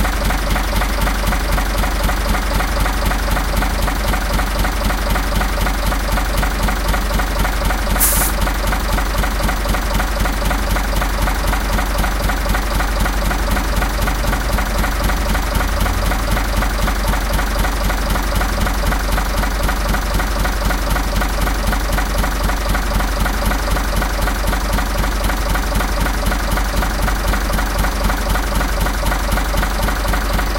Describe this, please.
old red London bus (Routemaster) engine idling
Approximately 50 year old London Routemaster (closed-platform type, reg: VLT 259) with engine idling on quiet suburban road in SE London.
Occasion passing cars in background. To my ear, this diesel engine sounds very well tuned. This has been trimmed to loop. Close your eyes and breathe in those imaginary particulates!
double-decker, sixties, red-bus